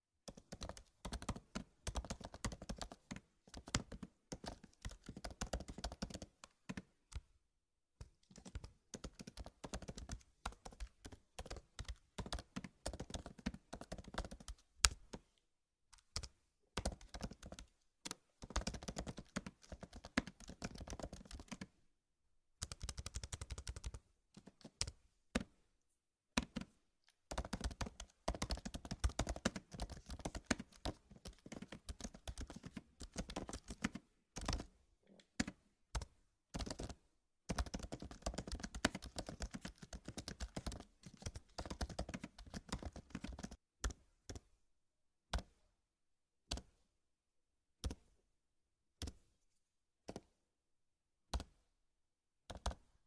Computer keyboard typing and keystrokes - Apple MacBook Pro 2018
Various typings on Apple MacBook Pro 2018 computer. Close up, multiple keystrokes, single clicks in different speeds. Recorded with Sennheiser MKE600 mic on a Zoom H5 recorder.
apple, buttons, click, close, computer, effect, fast, key, keyboard, keystroke, laptop, mac, macbook, modern, multiple, new, notebook, one, press, quick, sfx, single, slow, sound, technology, type, typing, up, various